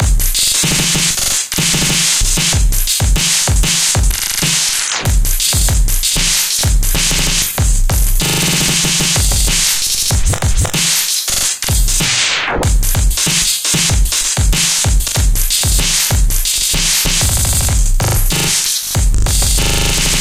Glitch Drumloop 95BPM
A glitched loop.
dnb, drumnbass